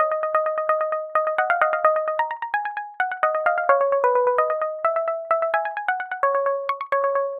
130BPM Ebm 16 beats
Logic, Sculpture, Synth